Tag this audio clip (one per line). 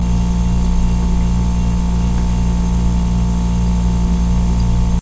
ambient
general-noise
background-sound
background
ambience
noise
tv-noise
white-noise
atmosphere